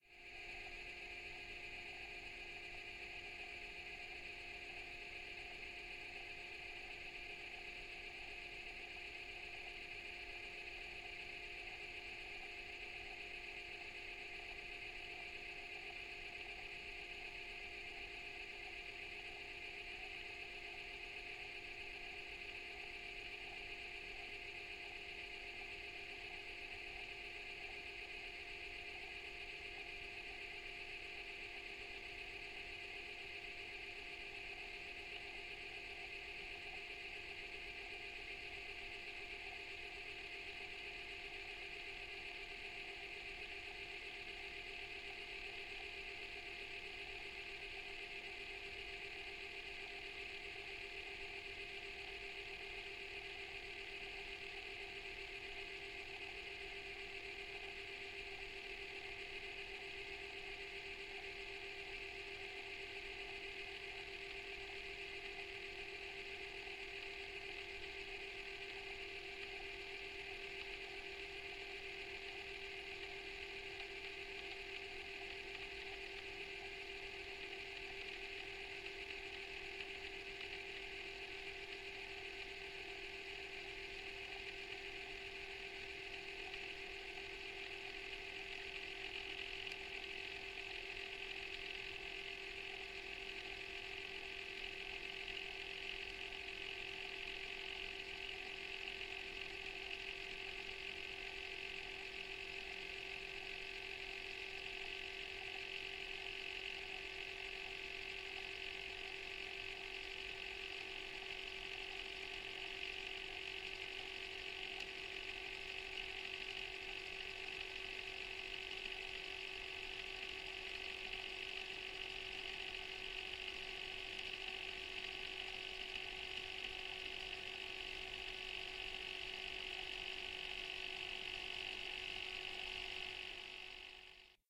Tascam Porta 07 - REW (with Tape)
A contact microphone recording of the Tascam Porta07 4-track recorder in play mode. The microphone was placed against the cassette shell whilst in rewind operations.